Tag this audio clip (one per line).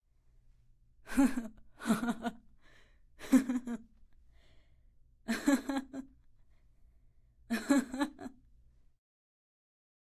Laughter
Woman
Breath